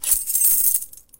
Metal keys pickup sound 1
The sound of lifting from the table a bundle of 6 metal keys
keys, metal, pickup